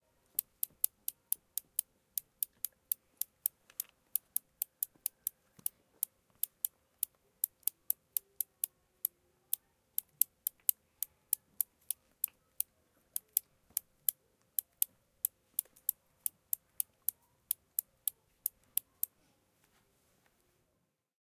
mySound HKBE Verona
Sounds from objects that are beloved to the participant pupils at 'Het Klaverblad' School, Ghent. The source of the sounds has to be guessed.
mySound, Verona